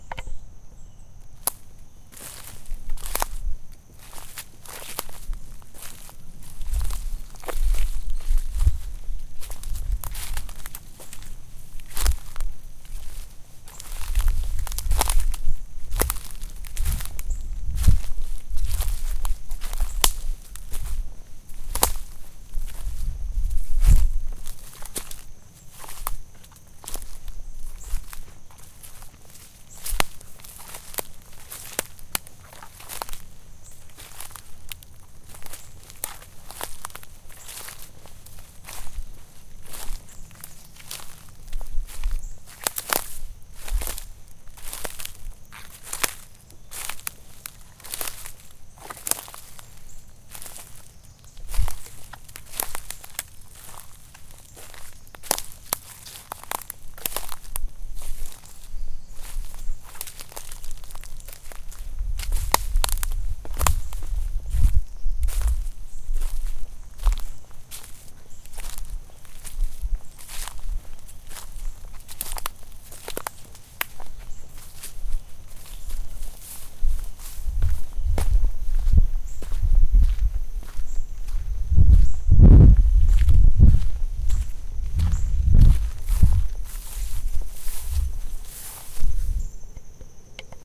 footsteps in woods
The sound of footsteps crunching a wooded area. I walked at a casual pace and recorded the underbrush snapping and cracking. Recorded in Georgia at the Blankets Creek hiking and biking area.
crunching, trot, forest, footsteps, zoom, wood, walk, walking, wave, woods, twigs, pace, footstep, hiking, h4n, underbrush